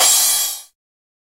By Roy Weterings
I used this for making Early Frenchcore tracks in Ableton Live.